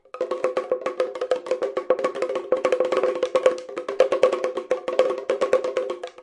the sound of a brazilian "spinning drum", a weird percussion instrument made with two coconut shells with skin heads, held together by a wooden handle. As you spin the thing, 4 palm seeds (attached to the drums by a short string) beat the drums pretty randomly. Weird. (Rodent4>Fel mic booster>edirol_r1)/un tambor giratorio brasileño